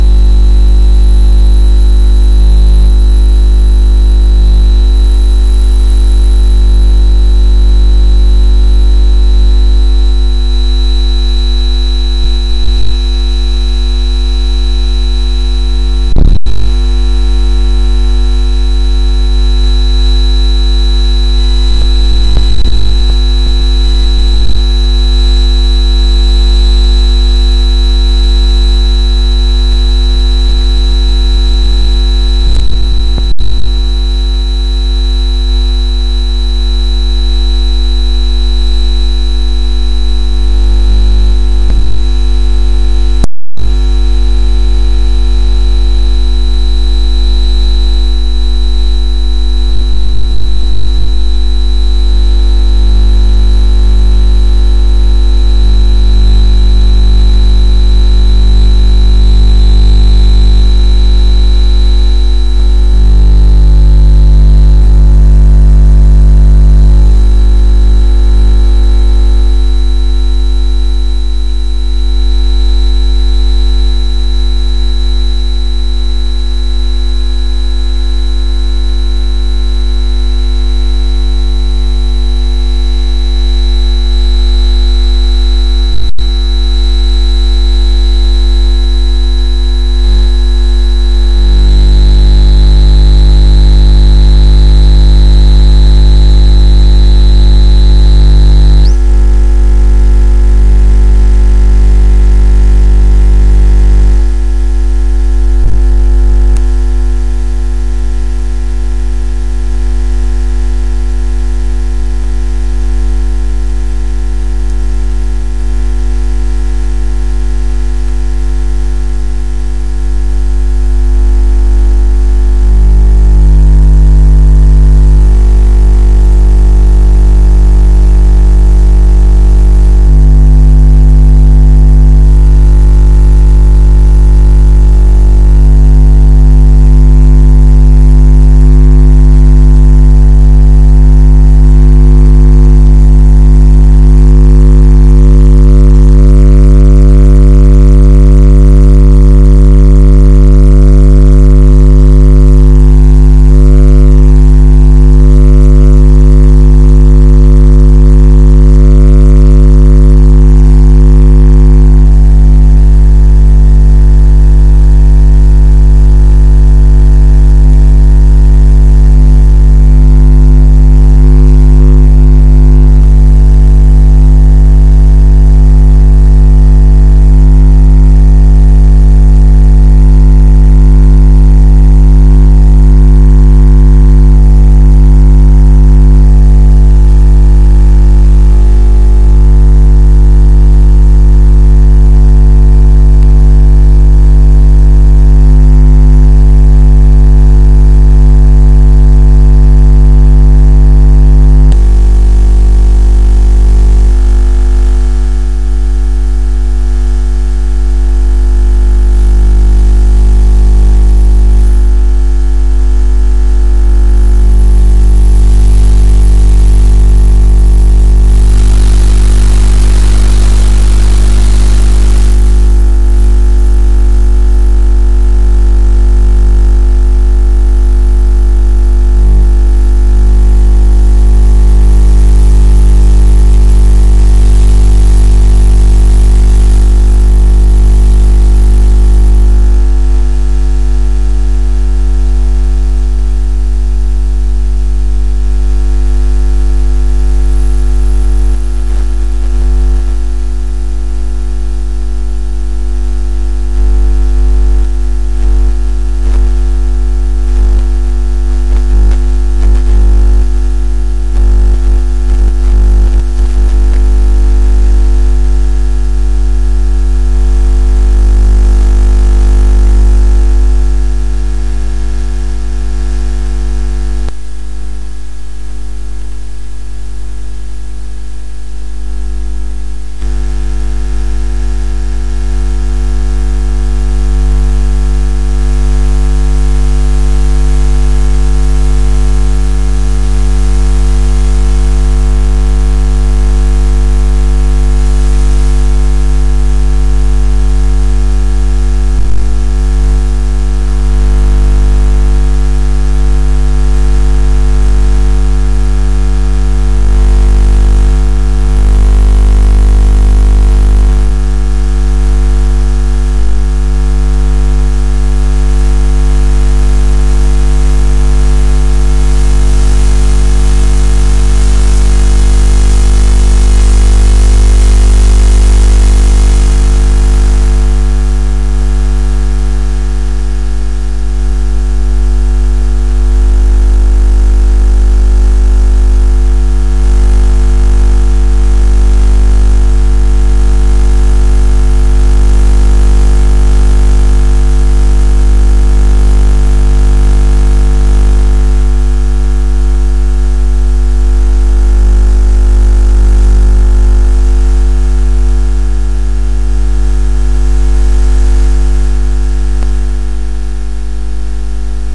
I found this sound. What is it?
Sound isn't very pleasant in it's base form. However when slowed down it produces an interesting sound that could be used for anything from tanks to motorcycles to some weird scifi effect.